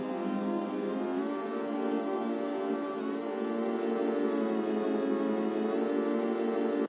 WANDERING PITCH
A Blurred Pad known in Drum&Bass; / Breakbeat Music
Made with FL Studio slight EQing.
Pitched, Blurred, Uplifting, Bass, Future